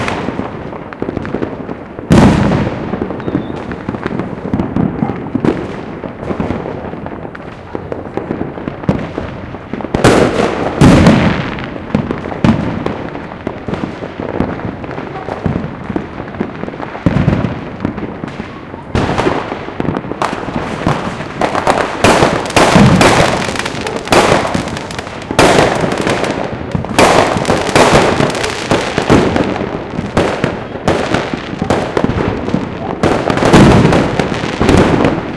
pirotecnia navidad1
one of my firsts catches with my h4n. 2011 Christmas above my house. Part II